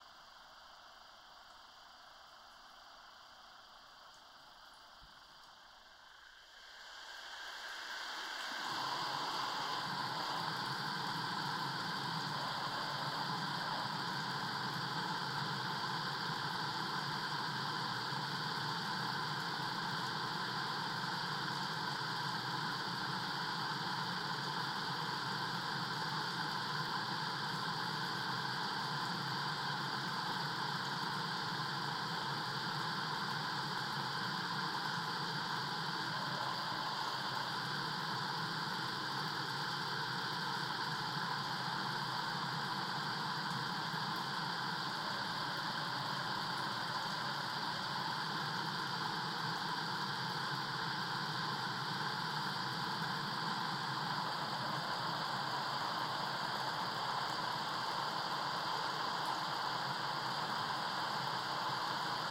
Recording of water flowing from a pipe using Korg CM300 contact mic and H6 recorder.